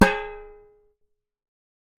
Hitting a metal lid
Hitting the lid of a metal box
lid, hit, thump, metal